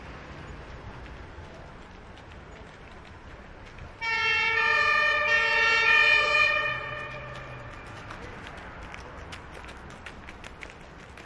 siren ambulance european distant echo nice with steps to clean
ambulance, distant, echo, european, siren